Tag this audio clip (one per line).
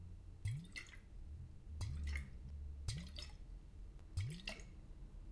bottle
effect
foley
fx
glass
slosh
sloshing
water